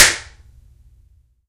More impulse responses recorded with the DS-40 both direct to hard drive via USB and out in the field and converted and edited in Wavosaur and in Cool Edit 96 for old times sake. Subjects include outdoor racquetball court, glass vases, toy reverb microphone, soda cans, parking garage and a toybox all in various versions edited with and without noise reduction and delay effects, fun for the whole convoluted family. Recorded with a cheap party popper